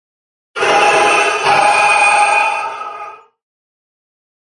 Breathing Industrial Game: Different breathing with some distortion. Sampled into Ableton using distortions like Trash2, compression using PSP Compressor2. Recorded using a SM58 mic into UA-25EX. Crazy sounds is what I do.
breath, breathing, dark, distortion, electro, electronic, game, gasping, gritty, hardcore, industrial, male, porn-core, processed, rave, resonance, sci-fi, sigh, sound, synthesizer, unique, vocal